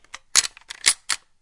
Cocking Automatic Shotgun Slow
Cocking back the chamber of an automatic shotgun.
Automatic, Cocking, Shotgun, Loading